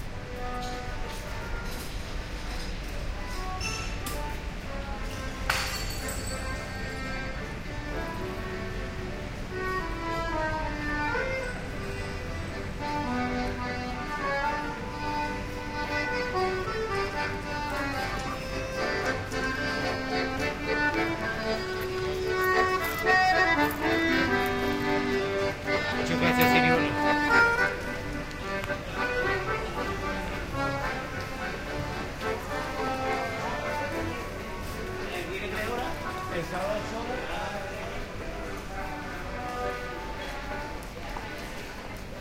streetnoise.acordion
Binaural recording done (as I walked) of pedestrian-only streetnoise,
with a glass breaking, a musician playing the accordion, then thanking
someone that passes by. Voices.
Done with a pair of in-ear Soundman OKM microphones / ruido de calle peatonal, con un vaso que se rompe, un musico callejero que toca el acordeon y da las gracias a alguien que pasa. Voces. Grabacion binaural hecha (mientras andaba) con un par de microfonos Soundman OKM puestos en las orejas